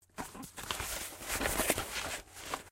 12 Cardboard Handling
cardboard, paper, box, foley, moving, scooting, handling,
cardboard, scooting, paper, foley, moving, handling, box